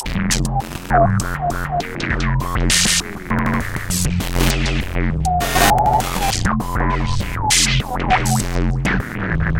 One in a series of 4-bar 100 BPM glitchy drum loops. Created with some old drum machine sounds and some Audio Damage effects.